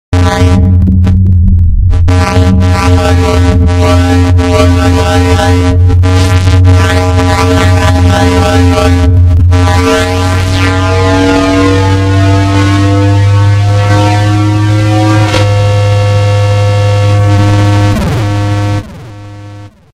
hardcore siren 2 1
A fm gabber-style effect.
synthedit, dub, space, synthesized, reverb, fx, effect, alarm, scifi